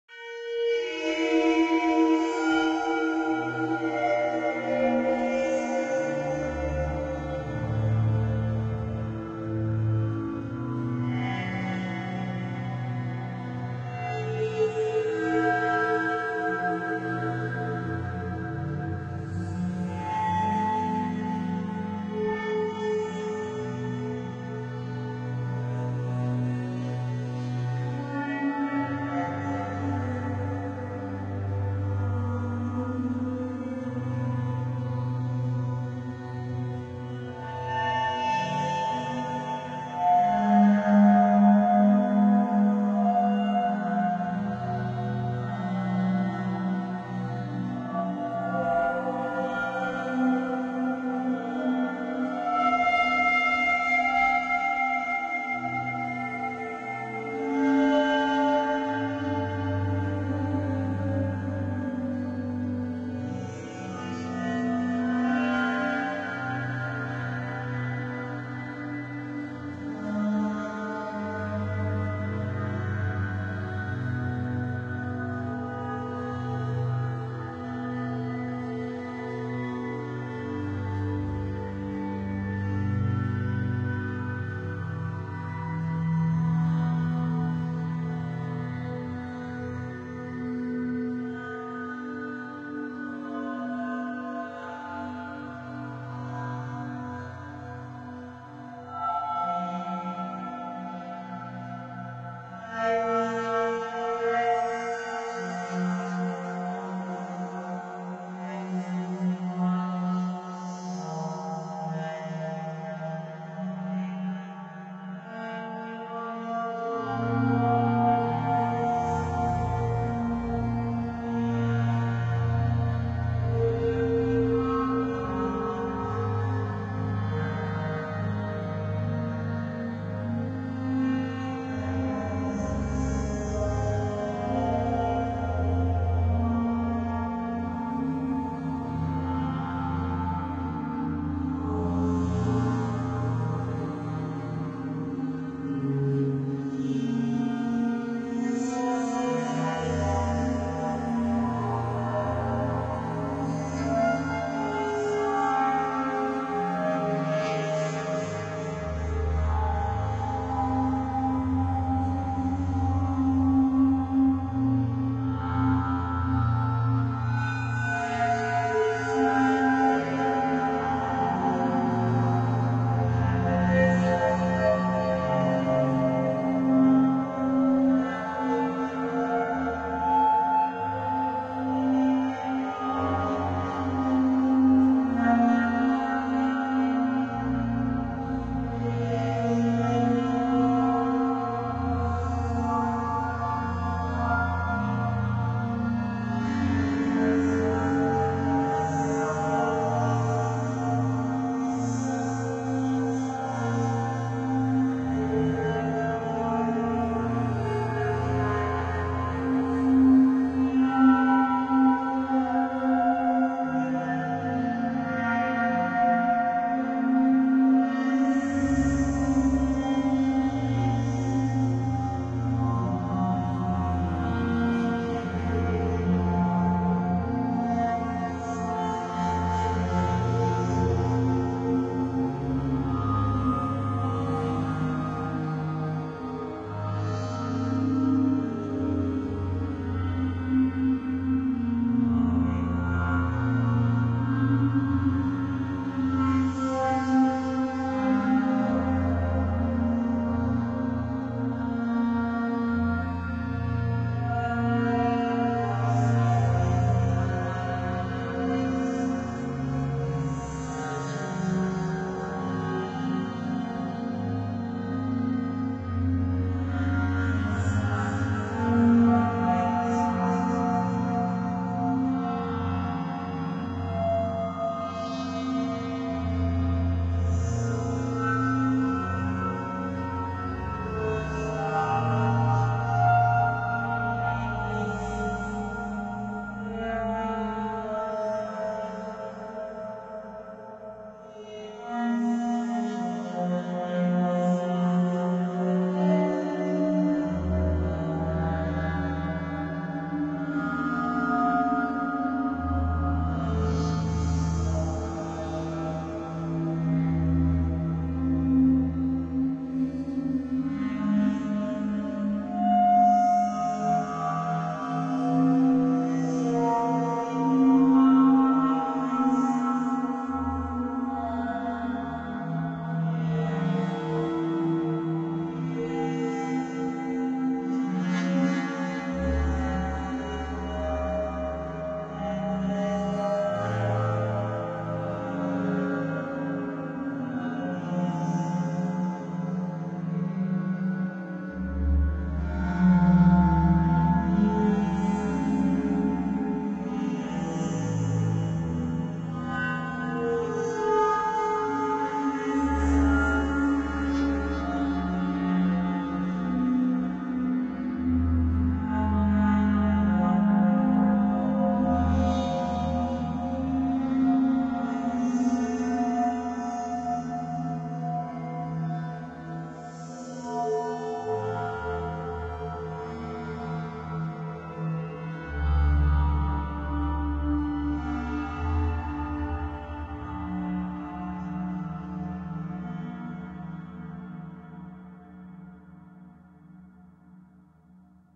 Content warning
This is extracted and processed audio from webcams. What you are hearing is background noise that I've amplified, and sometimes dialogue that is processed beyond recognition.